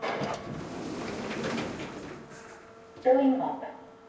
A sliding metal lift door recorded from the exterior.
lift, sliding, car-park, metal, mechanical, door, elevator